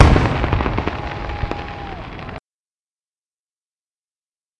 hit with distant whoa
recording of a firework explosion with some distant 'whoa' shout out
ambience, cheer, crowd, distant, ecstatic, explosion, fire, fireworks, hit, loud, outside, people, whoa